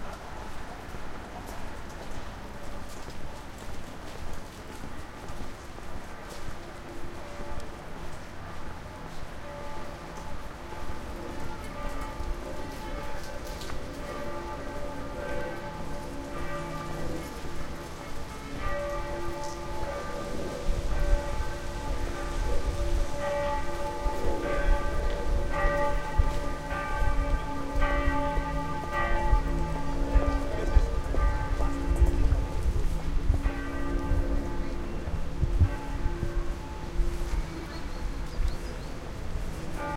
Karlsplatz 2b Glockenläuten
Recording from "Karlsplatz" in vienna.